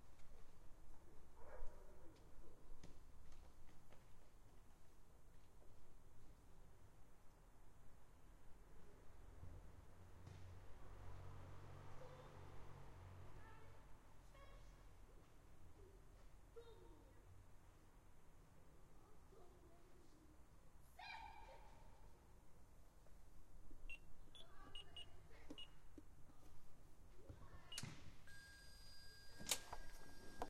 podworko domofon
enjoying the summertime atmosphere in the yard of our place in warsaw for a moment before tapping the doorcode to go back upstairs. kids playing etc.
city, ambience, field-recording, door